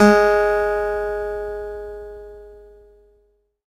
Sampling of my electro acoustic guitar Sherwood SH887 three octaves and five velocity levels
acoustic, guitar, multisample